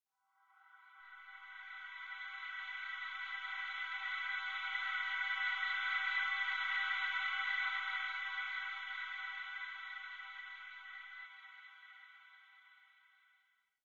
Krucifix Productions atmosphere
ambient, background-sound, ambience, ambiance, atmosphere, general-noise, soundscape, background